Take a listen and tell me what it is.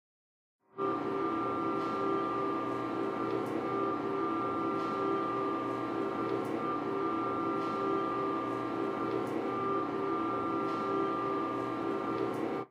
MITSUBISHI IMIEV electric car IDLE hum
electric car IDLE hum
car, electric, hum